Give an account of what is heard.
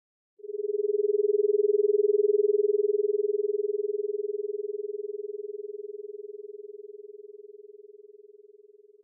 wine glass vibrato creepy